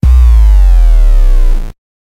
A distorted 808 bassline.